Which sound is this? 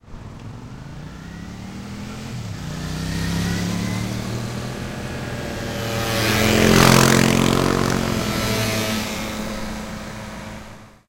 5 motorcycles passing in succession
motos, moto, motorcycles, trafico, traffic